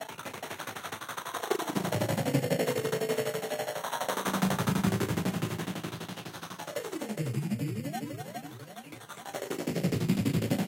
apoteg loop04
ancient bits of sound I had rendered a long time ago for a friend's music project I secretly collaborated on ;)
These are old programmed synthesizers with heavy effects, each one slightly different. And they're perfectly loopable if you want!
Apoteg, ambient, creepy, dark, experimental, glitch, lo-fi, lofi, loop, scary, synth, weird